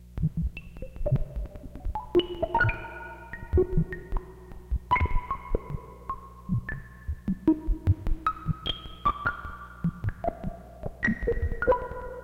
Sine Noise Droplets
designed from my emx-1. inspired by richard maxfield.
drops, synth, emx-1, noise, detune, bpm, sci-fi, droplets, 73, hardware, sine